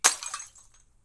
Bottle Smash FF171
1 high pitch, quick bottle smash, hammer, liquid, bright sound
bottle-smash, liquid-filled